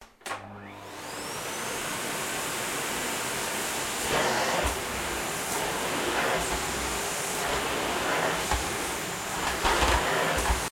A8 hlektrikh skoypa
cleaning the house with an electric vacuum cleaner
cleaner, electric, vacuum